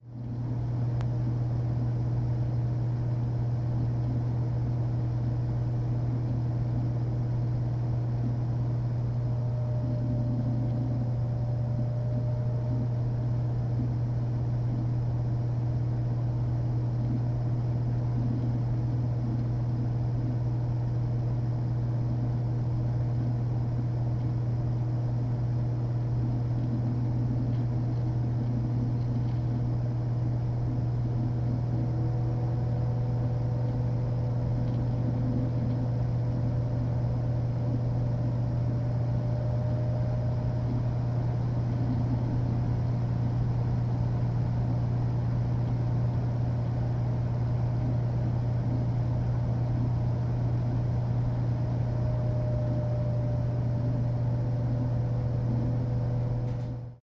Computer Fan and Drives
Recording near a computer fan and hard drive.
Not great quality recording. I had placed the mic right where all the air was blowing. Sounds best on lower volumes